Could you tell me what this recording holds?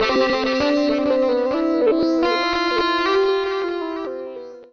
QUILTY - Bonechillin' Pads 005
I forgot about these samples, and they were just sitting in the FTP until one day I found them. I erased the hard copies long ago, so I can't describe them... I suppose, as their titles say, they are pads.